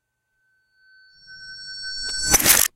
Reverse Sound Effect
Here is one of the three sound I created with one of "Zott820's" sounds "Cash Register Purchase", which you can find in some of my packs.
Gothic, Scary, Ghost, Reverse, Sinister, Spooky, Goth, Fearful, Sound-Effect, Nightmare, Alien, Zott820, Terror, Frightful, Fear, Unreal, Dramatic, Halloween, Fade-In, Frightening, Eerie, Horror, Threatening, Haunted, Slender-Man, Terrifying, Evil, FX, Bell